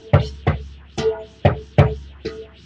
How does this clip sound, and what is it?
stoneware drums, goatskin heads, middle eastern doumbeks
drum, doumbek, percussion